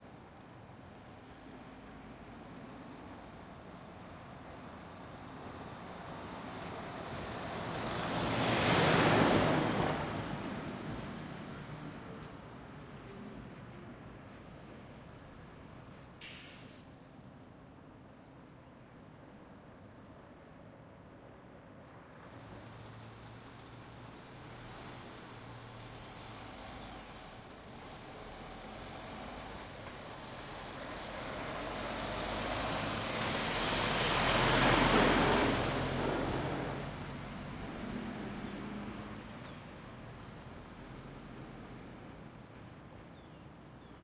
BG SaSc Truck Trailer Passes Speed Pass Passing Berlin
Truck Trailer Passes Speed Pass Passing Berlin.
Berlin, Pass, Passes, Passing, Speed, Trailer, Truck